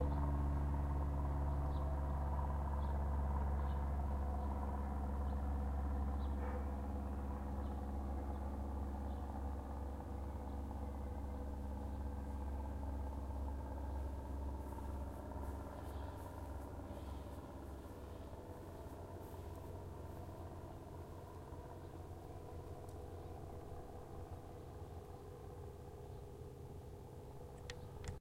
Helicopter Flying Overhead
Helicopter flying low overhead